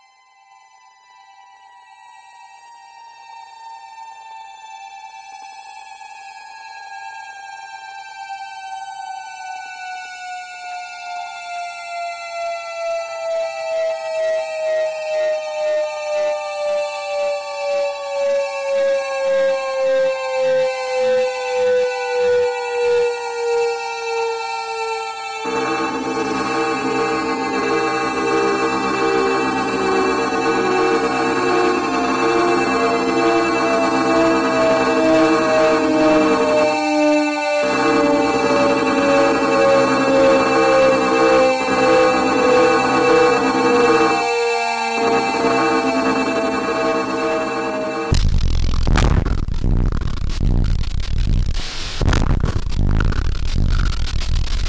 Same as yesterday, but I've added the sound when the huge vehicle extends its two enormous landing gears. For getting the feeling of megapower when whole village shakes you need a speaker system that can generate at least 100 watts at as low as 10 Hz. I have a 800 watt sub which I can only use when cats and wife aren't in the house.